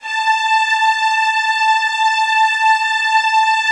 12-synSTRINGS90s-¬SW
synth string ensemble multisample in 4ths made on reason (2.5)
strings, synth, multisample, a4